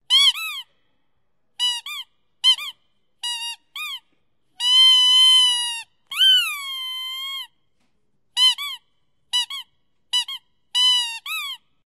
rubber duck -CsG-
duck, rubber, soundtoy, squeak, squeaking, squeaky, toy, tweet